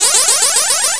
Teleportation beam
Synthesized using wavetable technique.
teleportation, synthesized, wavetable